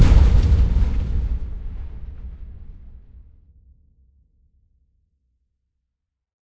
Big Structure Collision Heard from Inside
Big and deep impact of a massive structure heard from inside, smaller and closer elements can be heard trough the collision. The source of this recording comes from a rough landing of a big plane, inside there were a lot of metallic boxes.
structure, big, huge, collapse, impact, vehicle, metal, unearthly, collision, crash, gigantic, far, massive, interior, plane, metallic, thud, distant, accident, machine